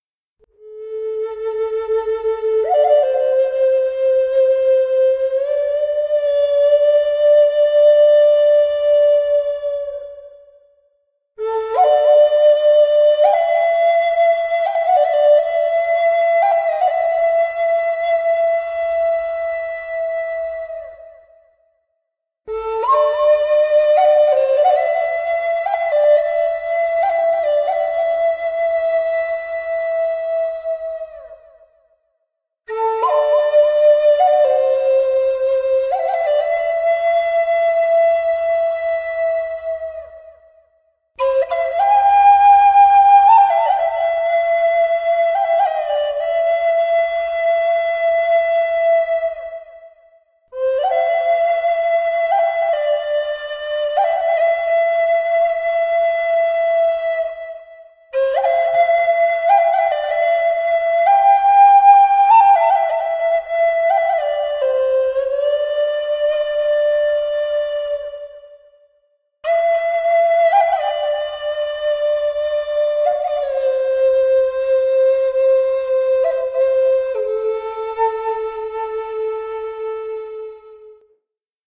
Native American Style flute in A
It has been a while sense I've posted anything so I thought I would share A Bit of A. This is a Native American Style cedar flute in the key of A. It will loop pretty good. Hope you like it, Ed
Acoustic, ethnic, instrument, native, Native-American-flute, solo, wind, wood, wood-wind